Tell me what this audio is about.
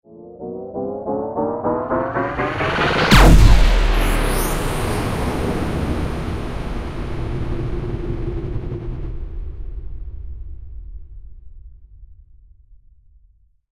The spaceship's large ray gun fires.
atmosphere, large, laser, ray-gun, science-fiction, sci-fi, spaceship, technology